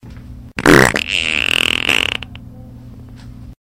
fishing reel fart
This fart likes to go fishing.
aliens, car, computer, explosion, fart, flatulation, flatulence, frog, gas, laser, nascar, noise, poot, race, ship, snore, space, weird